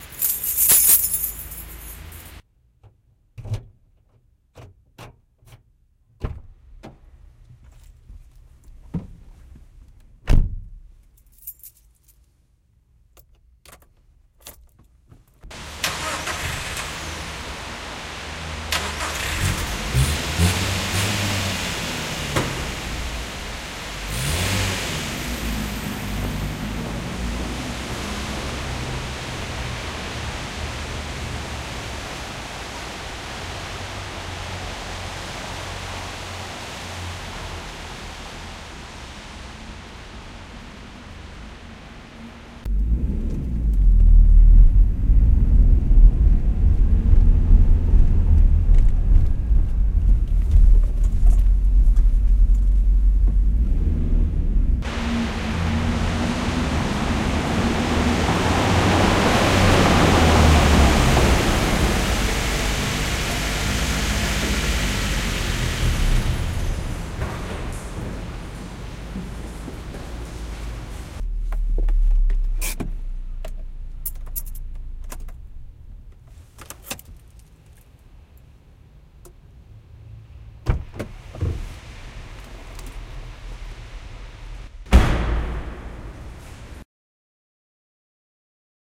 01 car sequence
car sequence (multiple points of view)